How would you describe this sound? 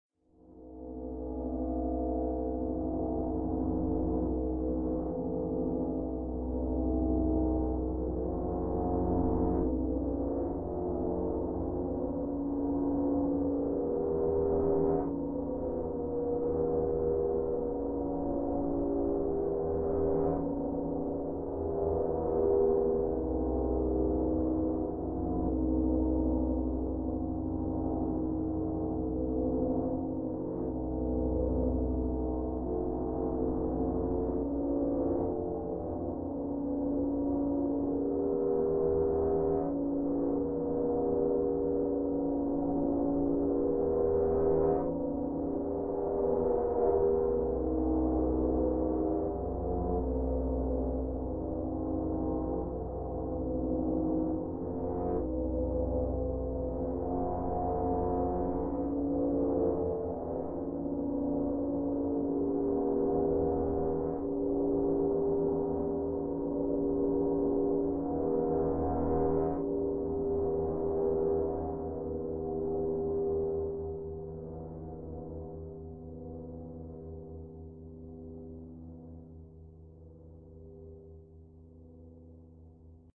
2 chords by preversed reverbs effect on electric guitar .... slow down by adobe premier CS5 about 35%
Ambient, angry, Cinematic, Dark, dim, Drone, echo, Film, guitar, Movie, nerve, preverse, reverbs, strange